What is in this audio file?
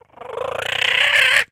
Animal / Cartoon - Cooing, Curr - Parrot
A cartoon parrot-like cooing